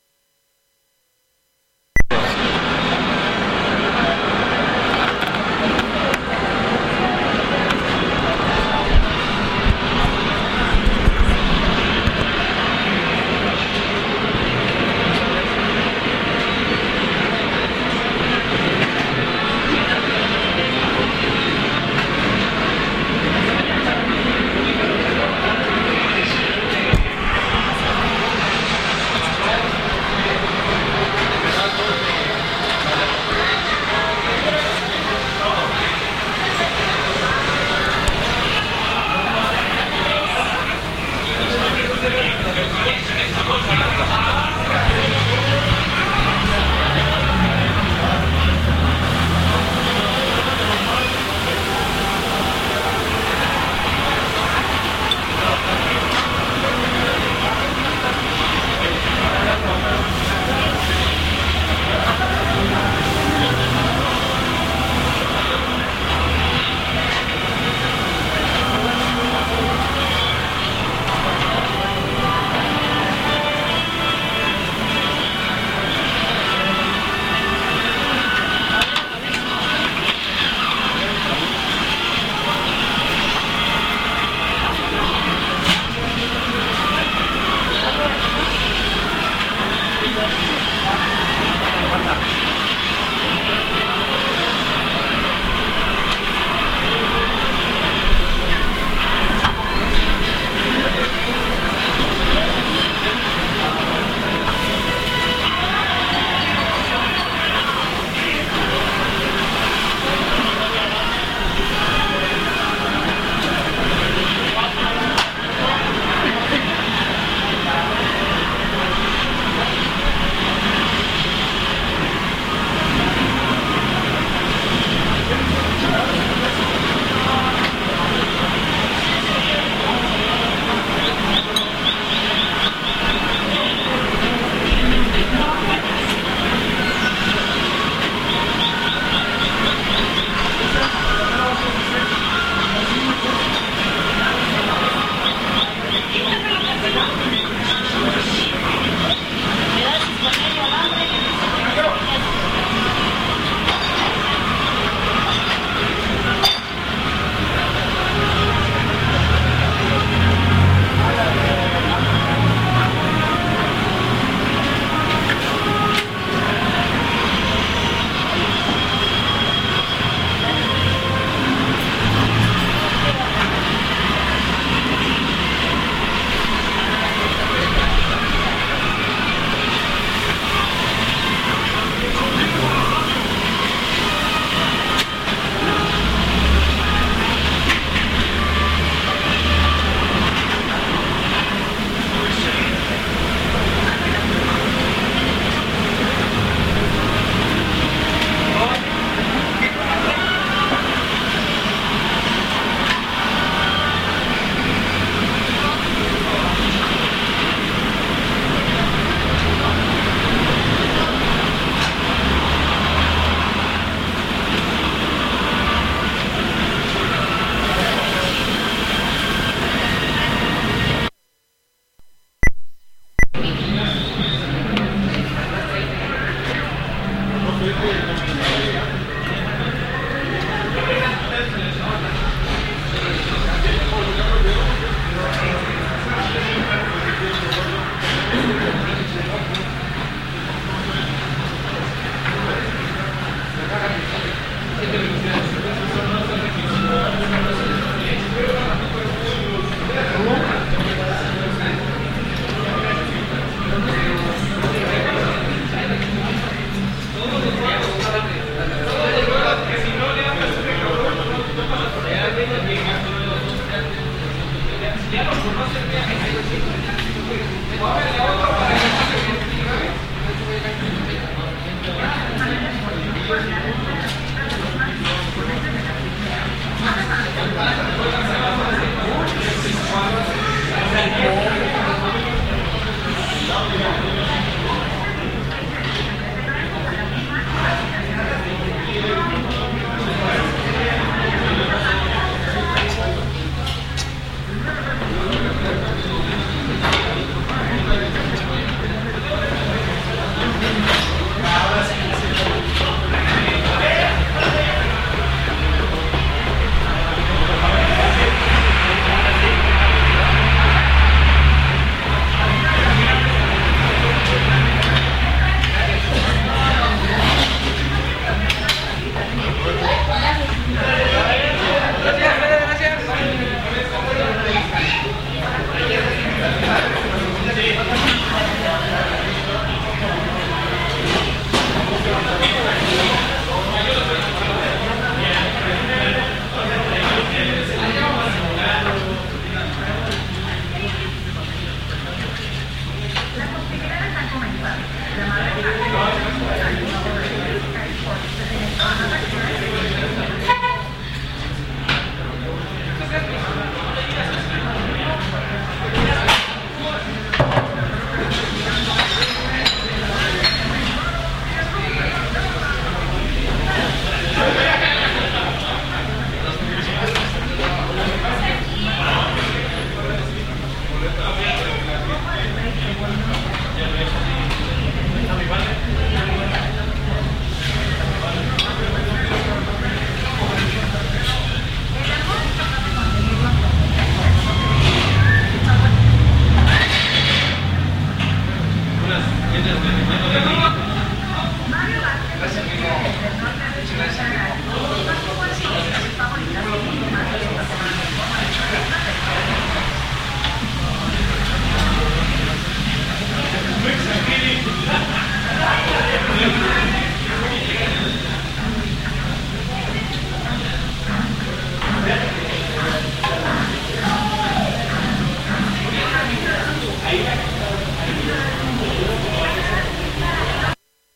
GRabación de una taqueria nocturna. Field-recording of a night taqueria
restaurant, restaurante, taqueria